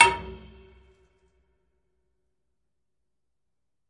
Tank of fuel oil, recorded in a castle basement in south of France by a PCM D100 Sony
fuel, oil, Tank